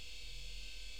hard disk2
my old hard disk and his voice part.2